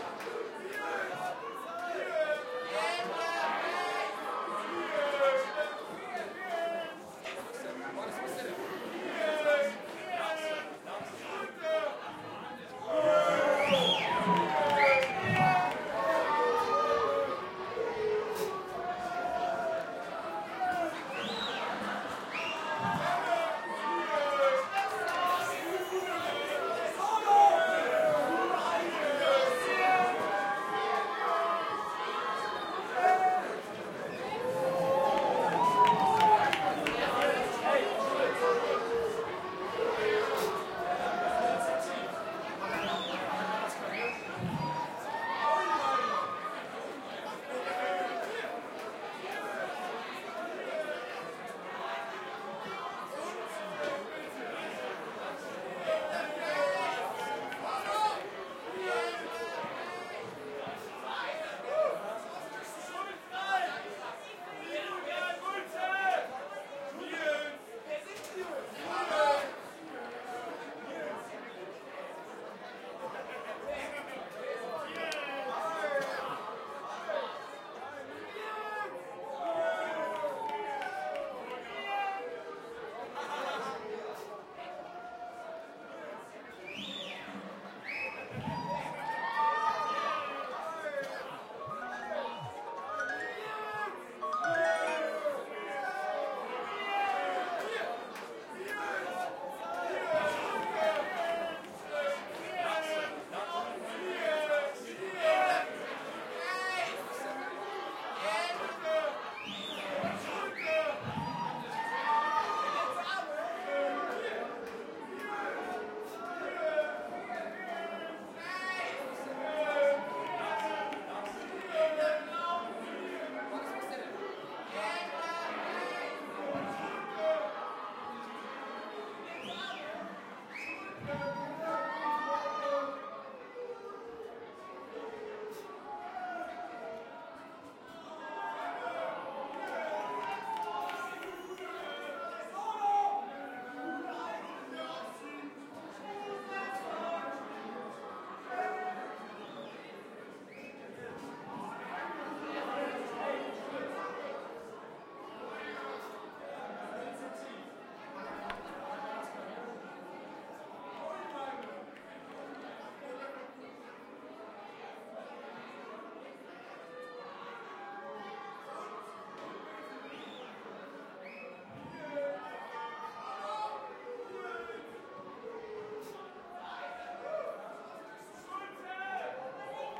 Loud party, drunk crowd
Party, chatter, cheer, club, crowd, drunk, loud, people, shout, walla